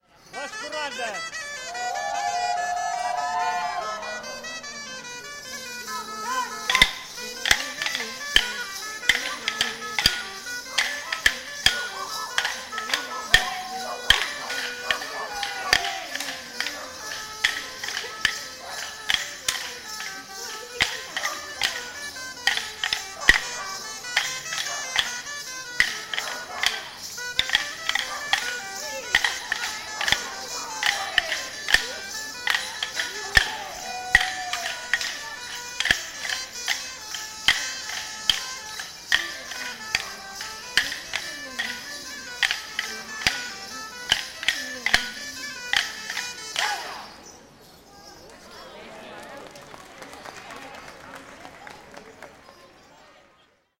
Bastoners de Terrassa
A group of bastoners performing stick-dance accompanied by a gralla through the strees of the district (passacarrer). Sounds of steps, voices, clapsticks and small bells attached to the ankles of their costume. Recorded during the main festivities of Sant Andreu district, 30 nov 2013. Zoom H2.
bastoners, bells, clapsticks, dance, gralla, sonsstandreu, stick